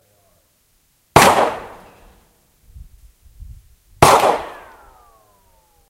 this was recorded at the site of impact. the second shot includes a good ricochet.